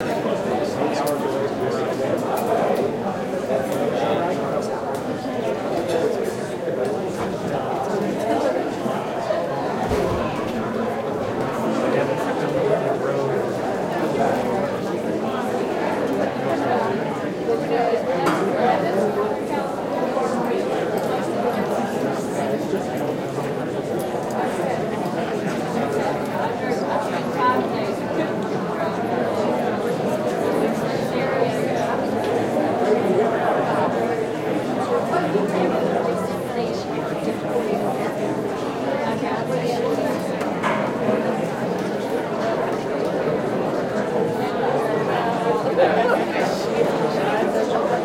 Crowd Noise 1
This was recorded at a wedding and celebration party afterward. Several hundred people talking in a very large room. It should be random enough to be used for most any situation where one needs ambient crowd noise. This was recorded directly from the on board mic of a full hd camera that uses Acvhd. What you are listening to was rendered off at 48hz and 16 bits.
crowd, sounds, talking